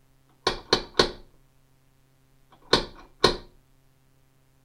door knock 3
A simple door knock sound - 3 quick 2 slower. In response to a request from rogertudor.
door, knock, sound-effect